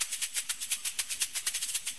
marac loop
thats some recordings lady txell did of his percussion band "La Band Sambant". i edited it and cut some loops (not perfect i know) and samples. id like to say sorry for being that bad at naming files and also for recognizing the instruments.
anyway, amazing sounds for making music and very clear recording!!! enjoy...
batukada, batuke, loop